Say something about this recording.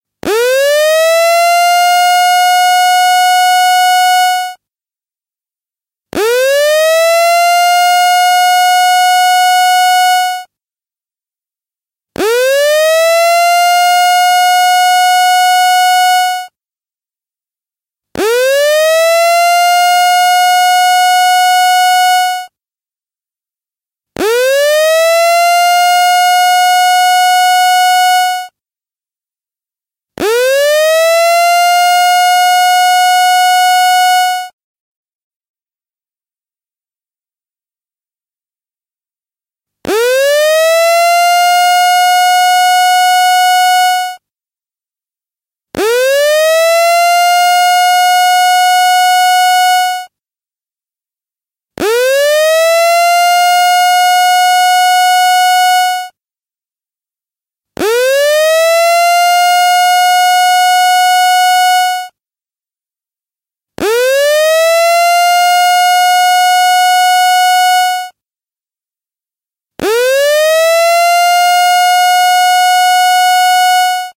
Loud Emergency Alarm
I cannot find this anywhere else, so here it is. Ripped from my school alarm system.
alarm, horn, warning, alert, sirens, emergency, klaxon, siren